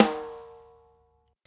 Snare sample - 2015 - 8 Gentle
Snare_sample_-_2015_-_8_Gentle